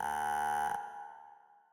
Buzz
A quick sample made out of a voice, recording a mouth click and quickly paste after eachother .. sounds like jail doors going to open.
bell, horn, buzz, jail, school